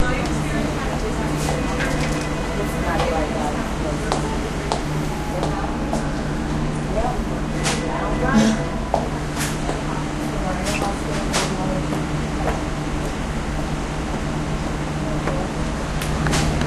Inside a hospital.